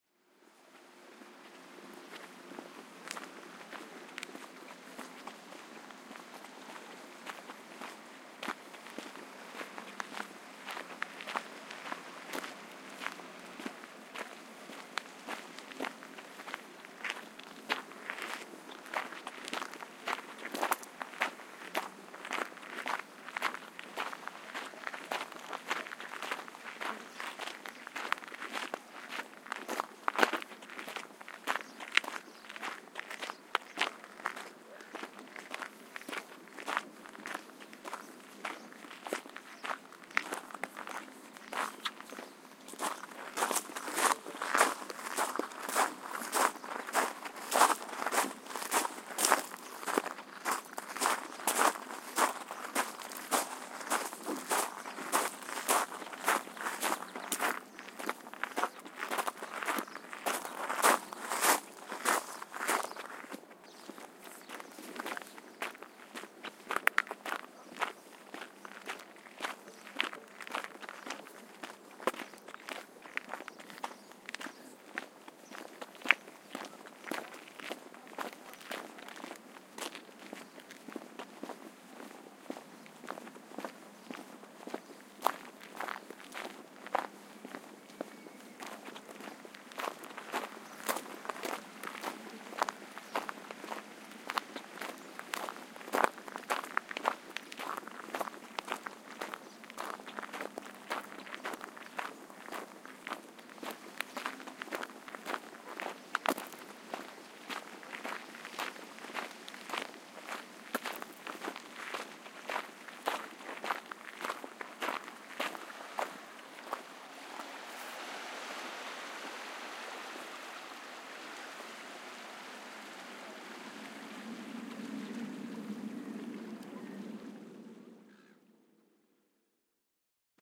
Walking on a Gravel Path by the Sea
Walking along a gravel path on the sea-shore to my car, several types of gravel were traversed.
crunch, dirt, field-recording, foley, foot-steps, gravel, path, stereo, stones, walk, walking